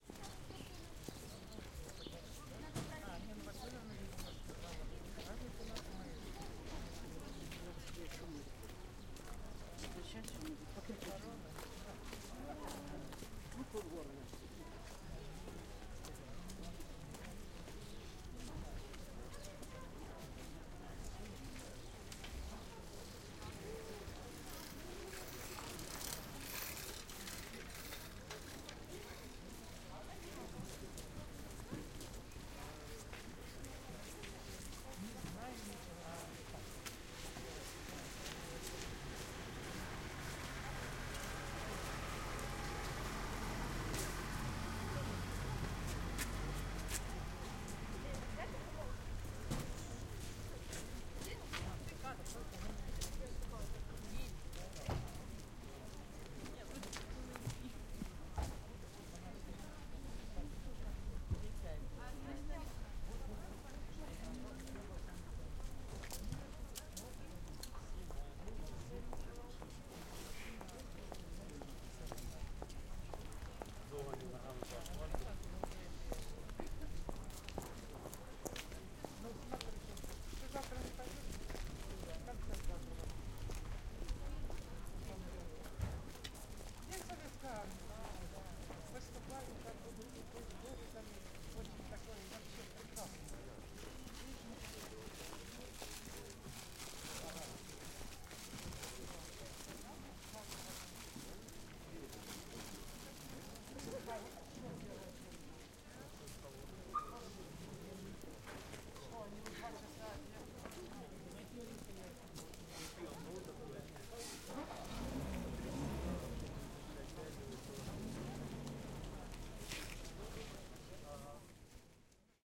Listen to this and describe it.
Ext, around marketplace
Footsteps, voices, bicycles, transport on the centre of village, medium activity.
exterior, marketplace, village